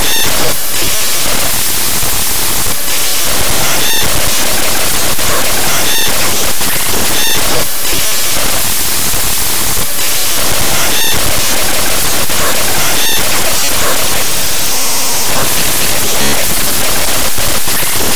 one year of spam harvest from my inbox to the sound editor